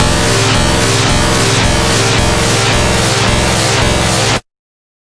110 BPM, C Notes, Middle C, with a 1/4 wobble, half as Sine, half as Sawtooth descending, with random sounds and filters. Compressed a bit to give ti the full sound. Useful for games or music.
1-shot, bass, digital, dubstep, electronic, Industrial, LFO, notes, porn-core, processed, synth, synthesizer, synthetic, techno, wah, wobble